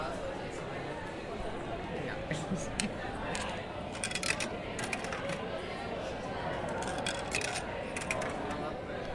MONEDAS-MONO-013
alguien esta en la maquina de comida poniendo monedas, comprando algo. podras escuchar el ruido de las monedas
money, coins, food, monedas, machine